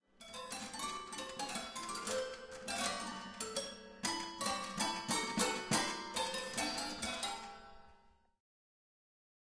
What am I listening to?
piano pour enfants-002
Piano jouet pour enfants
kids, piano, children, kid